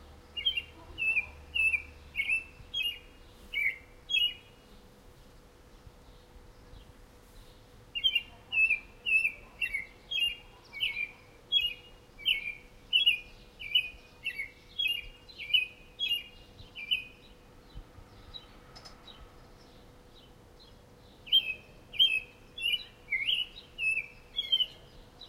Bird call - set of three
Very interested in what bird is making the call.
Recorded with a ZOOM H1. Noise-reduction applied.
birds, bird, call, song, nature, field-recording, birdsong